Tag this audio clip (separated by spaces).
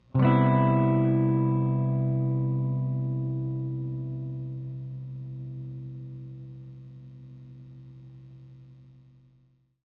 strum chord electric guitar squire sample jaguar